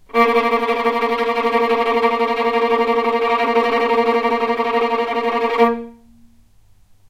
violin tremolo A#2
violin, tremolo